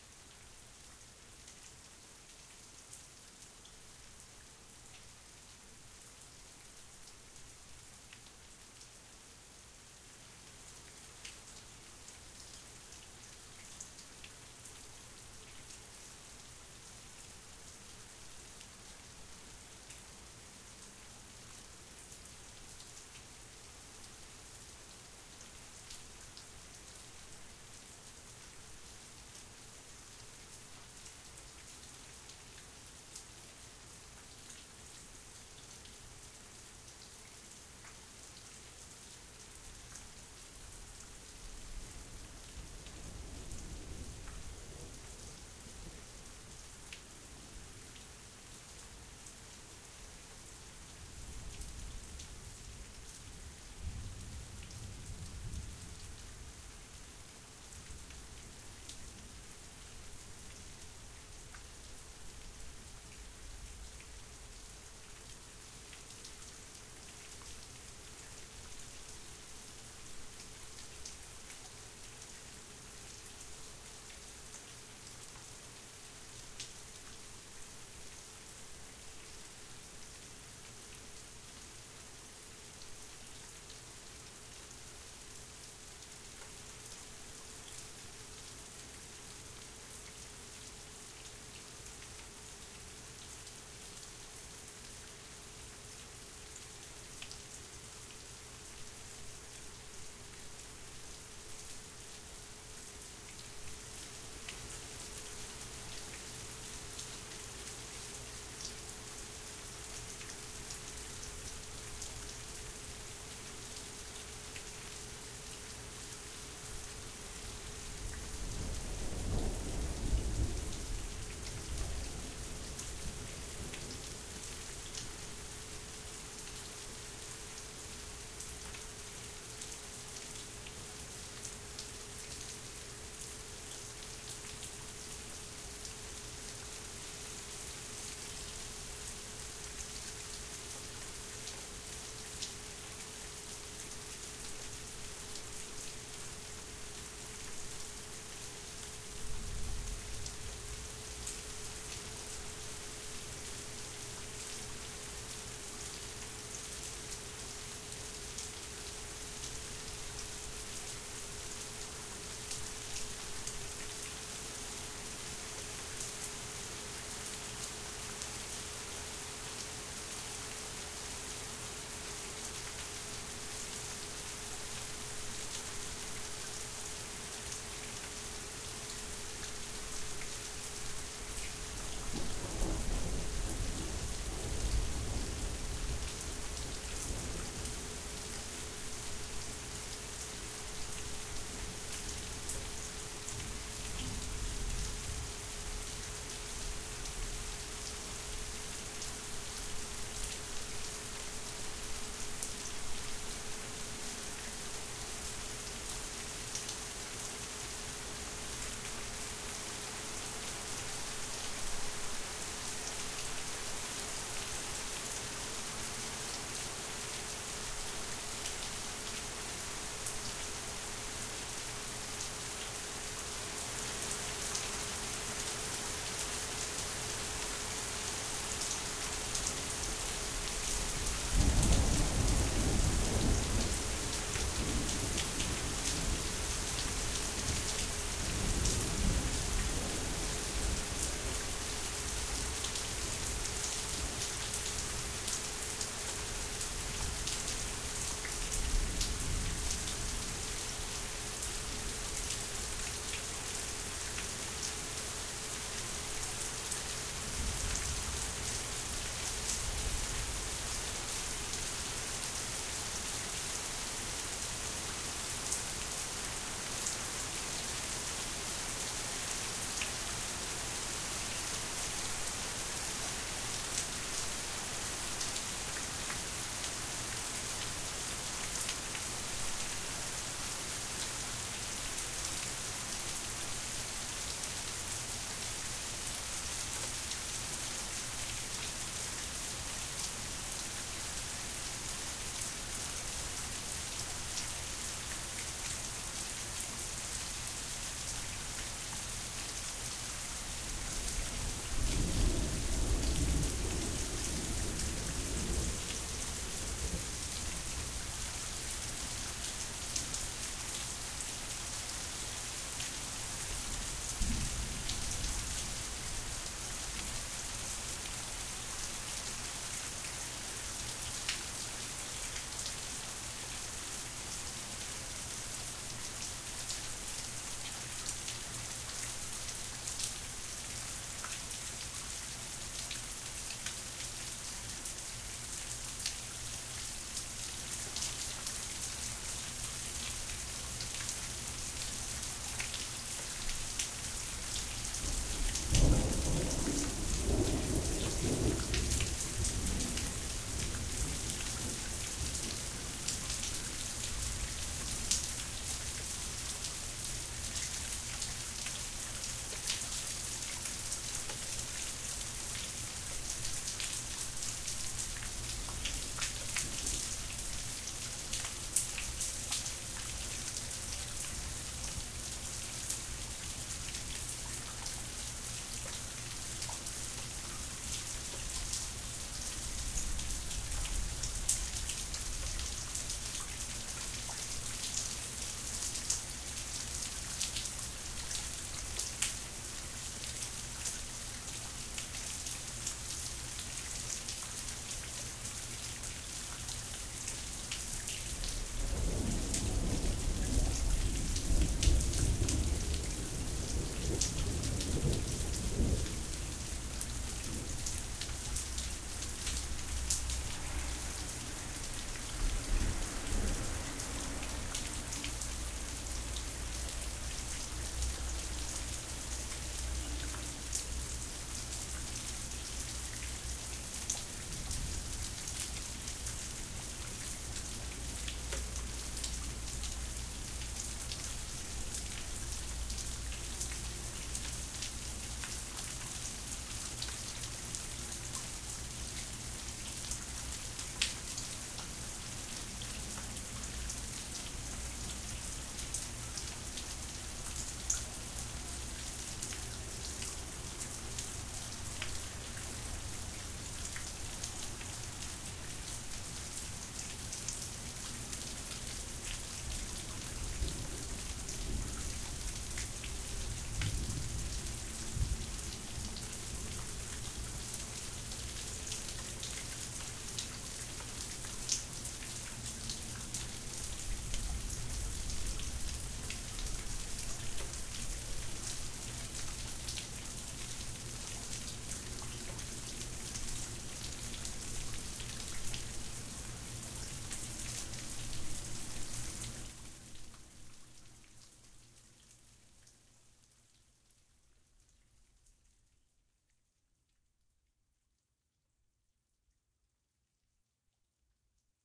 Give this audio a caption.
Extended recording of a short thunderstorm - building, climaxing and waning - recorded under an awning at the studio using an MXL 990 on a boom.
ambient, field-recording, rain, storm, thunder, water